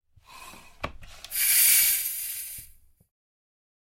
Bicycle Pump - Plastic - Medium Release 02
A bicycle pump recorded with a Zoom H6 and a Beyerdynamic MC740.
Gas, Valve, Pump